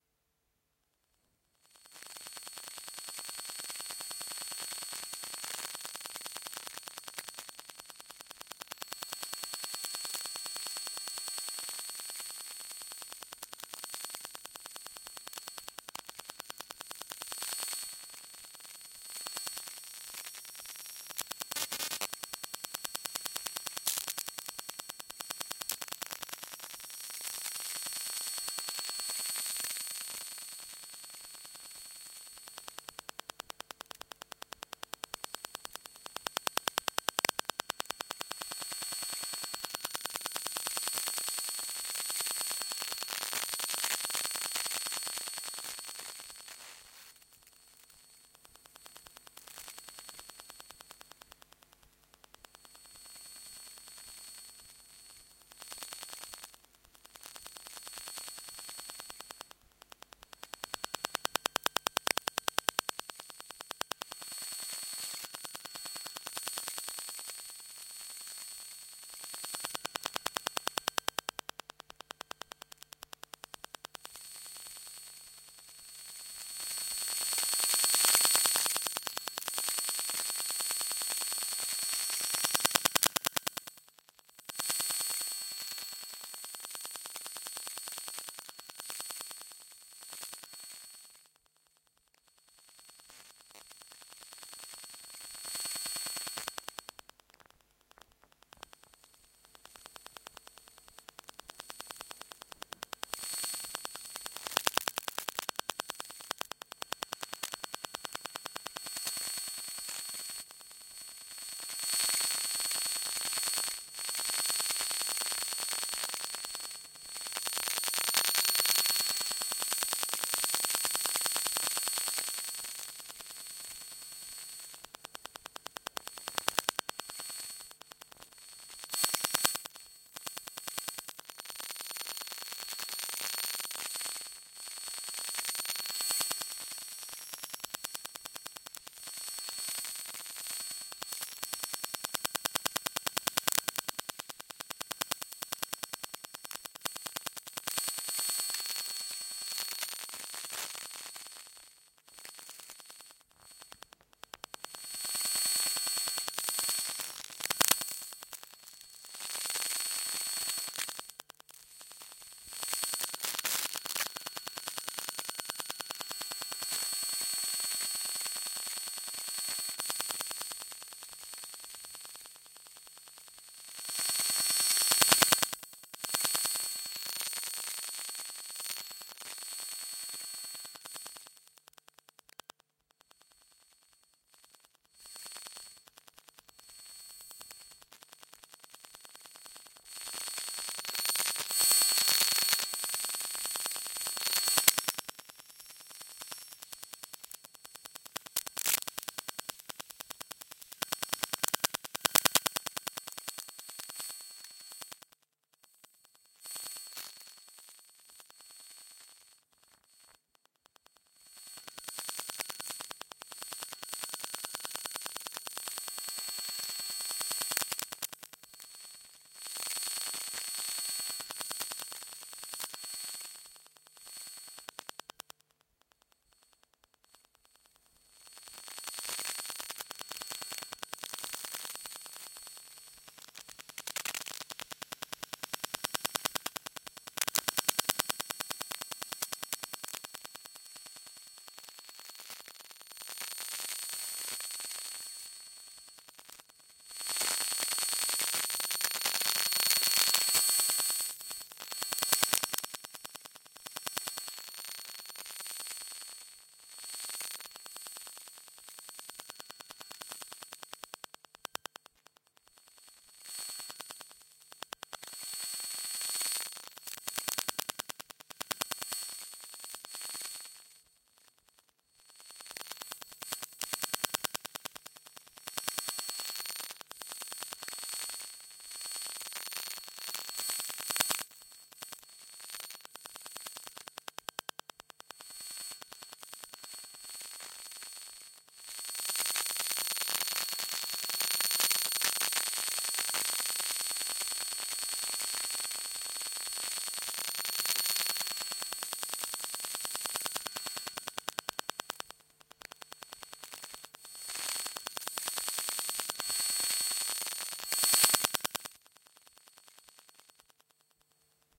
The sound of a router recorded with an induction microphone and a Zoom H1 Handy Recorder.